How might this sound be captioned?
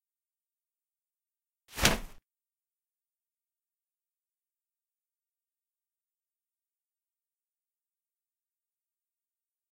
Spotlight clear
movie-feature, searchlight, Scheinwerfer, floodlight